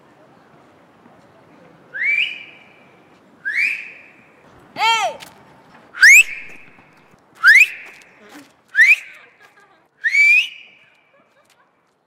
FX - Silbidos de aviso